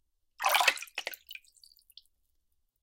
Water bubble 7
bubble, splash, Water, water-drops